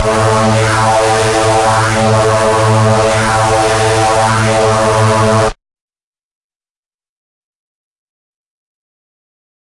multisampled Reese made with Massive+Cyanphase Vdist+various other stuff
distorted, hard, processed, reese